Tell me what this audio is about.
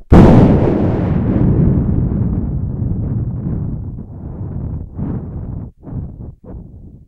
Quite realistic thunder sounds. I've recorded them by blowing into the microphone